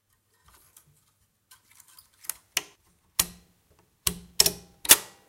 Super 8 mm setting up projector
Sound recording of a real super8 mm projector being installed, running the filmstock through it by hand.
cinema, film, foley, load, project, reel, rhythm, silent-film, super8